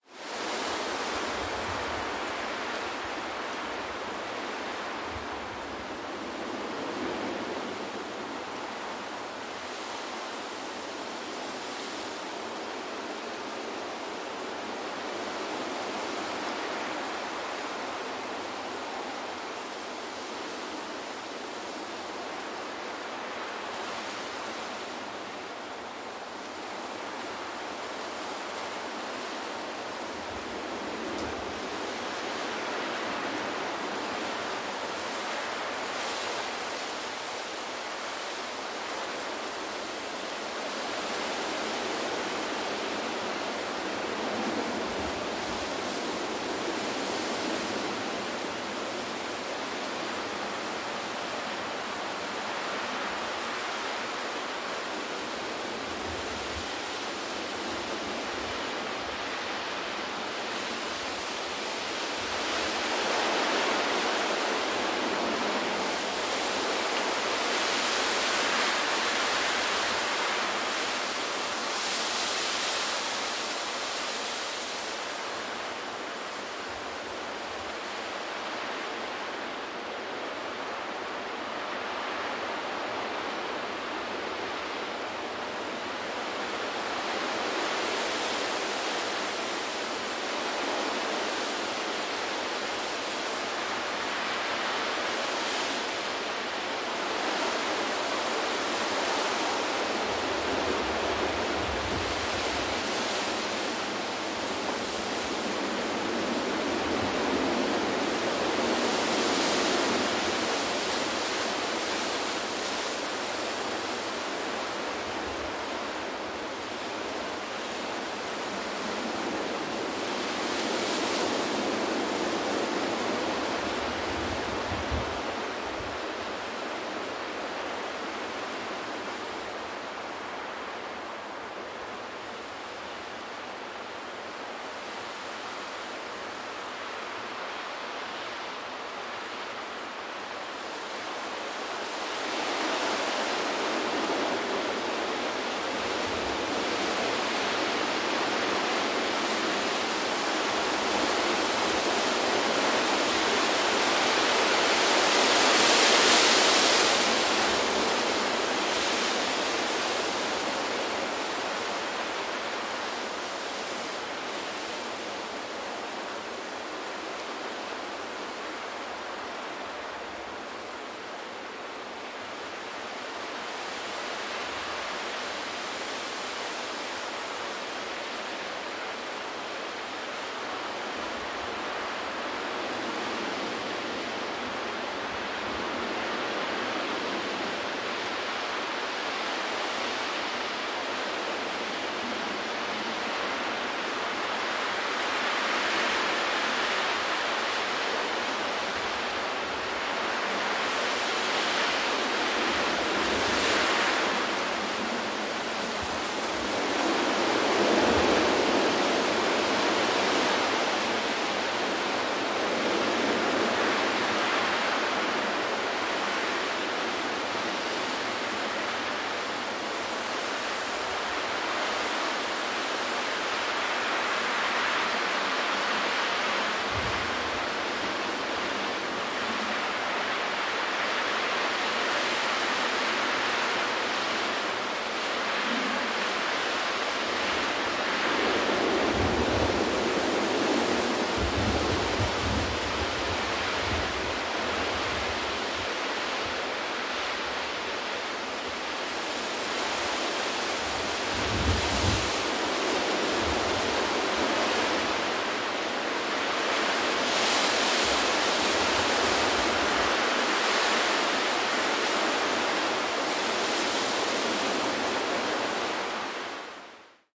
Stormy weather and strong wind
Today there was an extreme storm that motivated me to record the resulting soundscapes in a mini-recording-session...